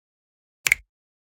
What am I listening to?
finger-snap-stereo-09
10.24.16: A natural-sounding stereo composition a snap with two hands. Part of my 'snaps' pack.